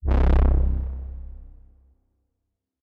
Alien movie sounds
I made this with serum is very similar to the sound fx of a aliens movie, if you are using this sound on a track please send me the track so I can hear it!
Alien, alien-sound-effects